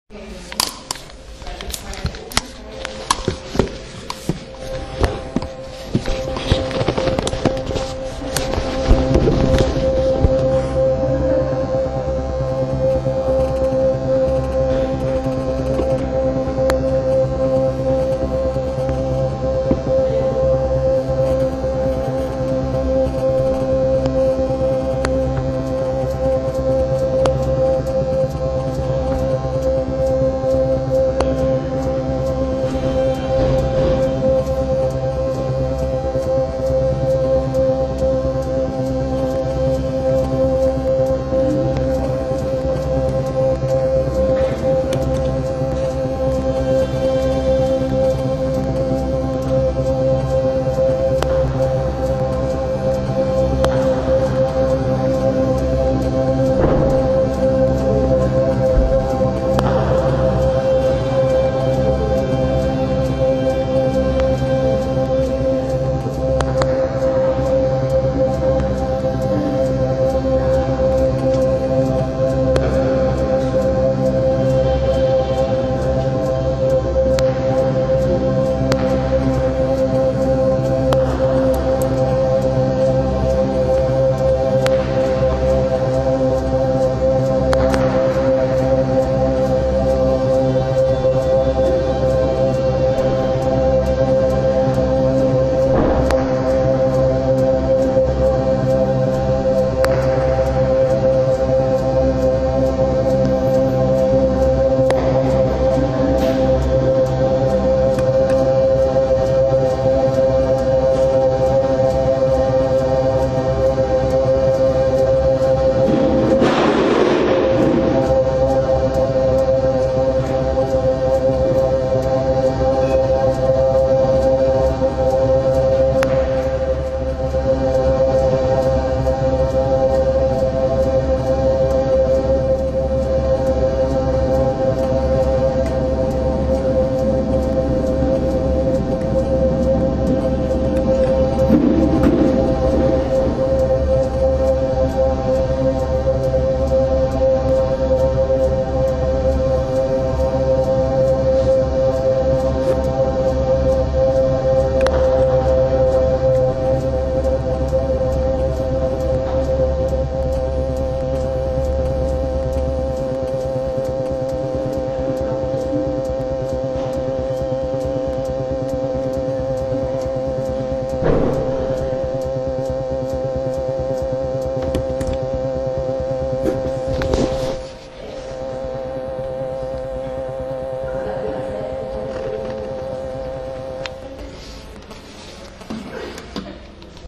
mod organ improvisation
Coffee break at Funkhaus, doing stuff on modded organ
ableton, coffeebreak, funkhaus, improvisation, loop2017, modding, organ